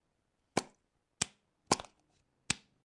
Aerosol bottle cap PLASMisc
Aerosol bottle cap being taken off.